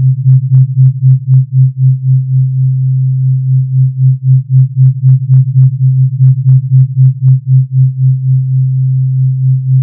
Low pitch amplitude modulation of 3 sine wave.
low-pitch, modulation, wave